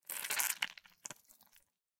Squelching SFX [17]
Squelching sound effect of blood/stabbing/flesh/gore.
(I’m a student and would love to upgrade my audio gear, so if you like/download any of my audio then that would be greatly appreciated! No worries if not).
Looking for more audio?